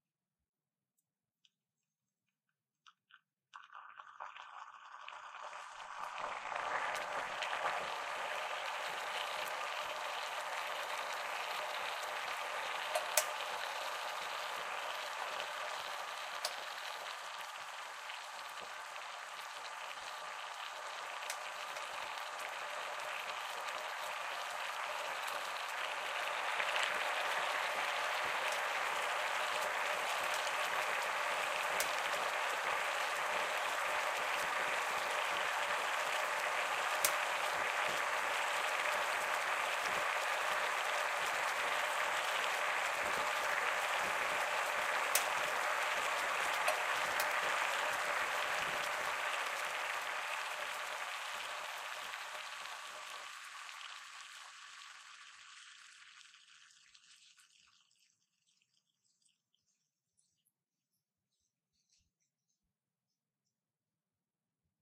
The sound of water being boiled in a frying pan.
Recorded using the Zoom H6 XY module.